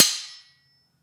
Sword Clash (31)
This sound was recorded with an iPod touch (5th gen)
The sound you hear is actually just a couple of large kitchen spatulas clashing together
clang, clanging, clank, clash, clashing, ding, hit, impact, iPod, knife, metal, metallic, metal-on-metal, ping, ring, ringing, slash, slashing, stainless, steel, strike, struck, sword, swords, ting